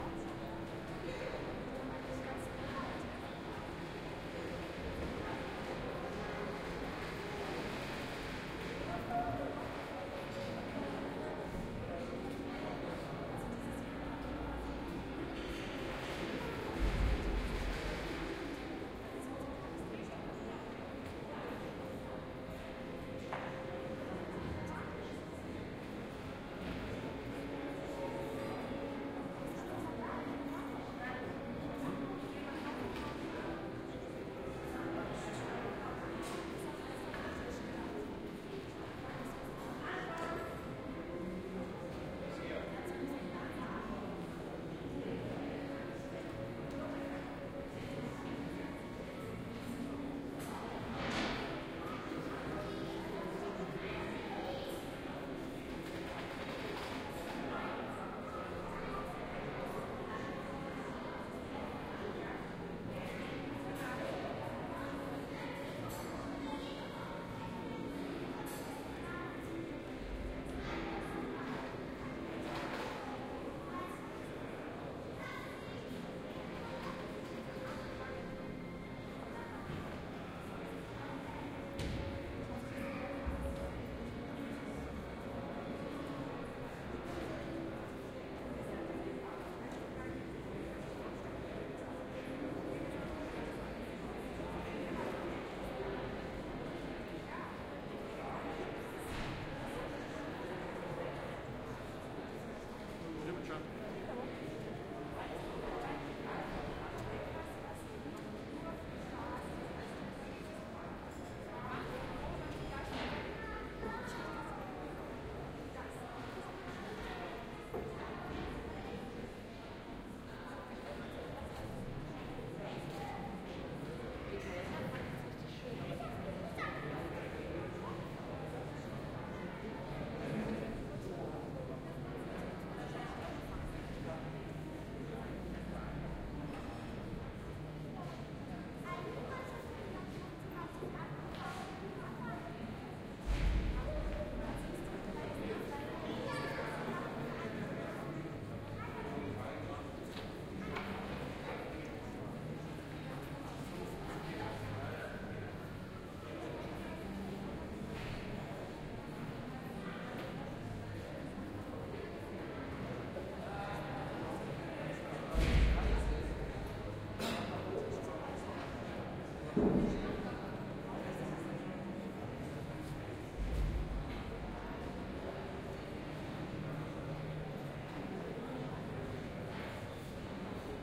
Crowd at Designersfair
From January the 18th to the 25th 2009, the first Designers Fair took place in the Cologne RheinTriadem, concentrating on young German designers. I recorded this track in the staircase, which you can see here:
You can hear the usual sounds of an event like that. R-09 HR, A3 adapter from Soundman and the OKM microphones.
field-recording, people